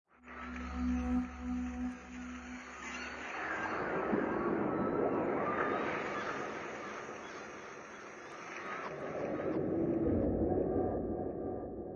ab lost atmos
sounds like deep sea